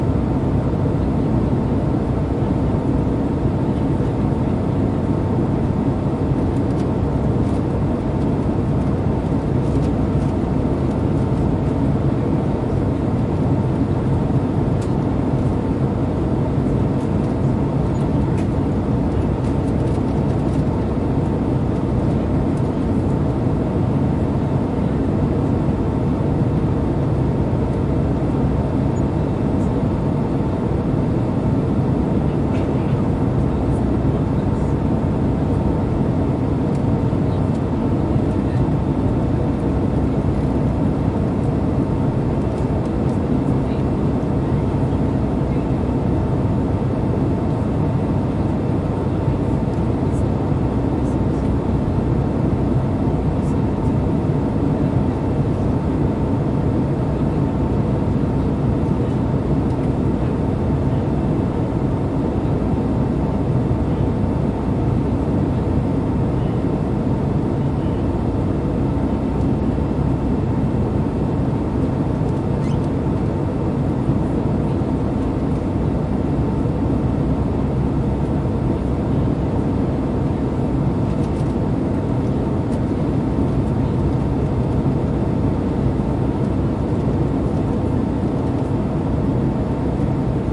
Airplane atmos
Recorded in an airplane over the Atlantic. Recorded on a ZOOM H4n
aircraft, airplane, cabin-noise, flight, jet, jet-engine, midair, plane